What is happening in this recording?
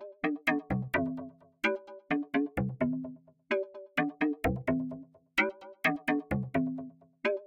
MIDI/OSC lines generated with Pure-Data and then rendered it in Muse-sequencer using Deicsonze and ZynAddSubFX synths.
music, new, electro, ambient, loop